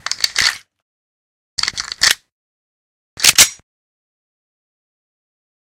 Pistol Manipulation 01
cock, gun, handgun, pistol, reload, weapon